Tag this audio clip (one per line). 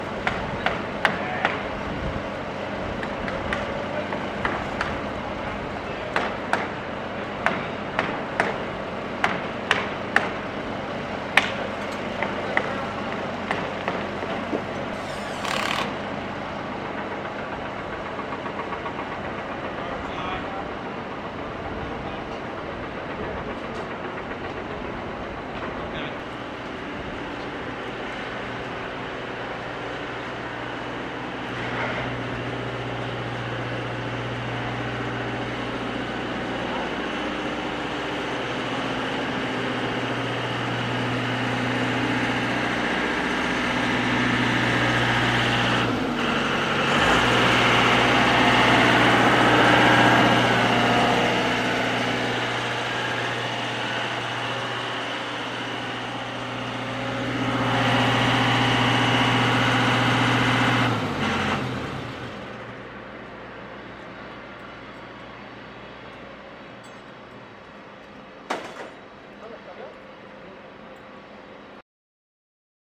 field-recording
construction